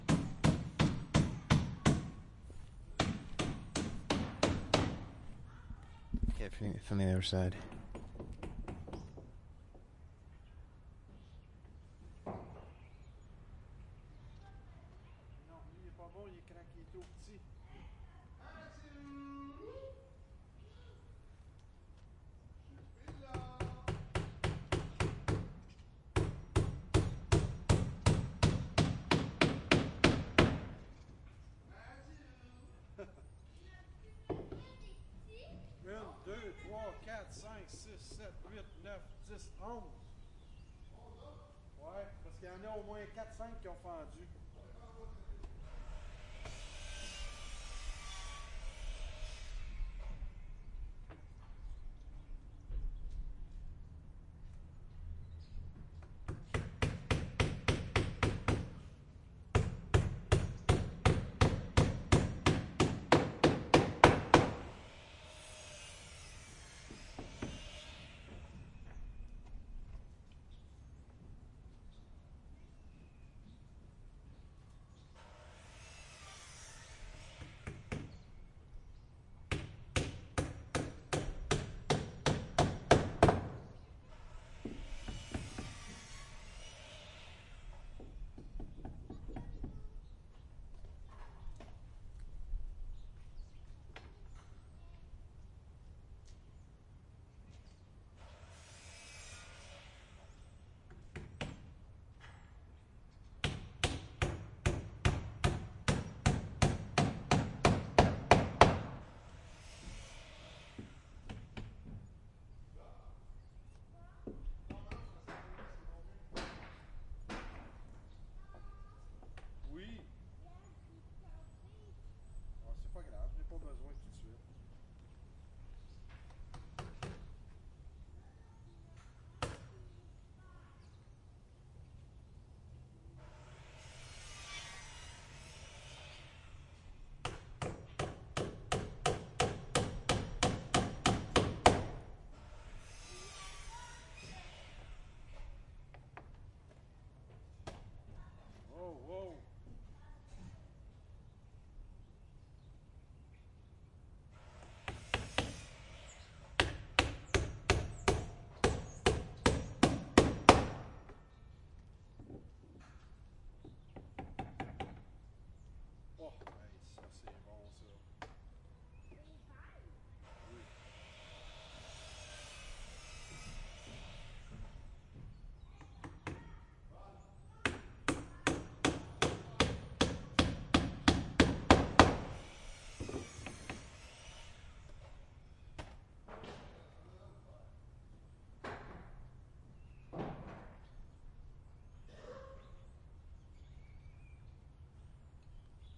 hammering and backyard quebecois voices father and son building shed closer Montreal, Canada
voices, shed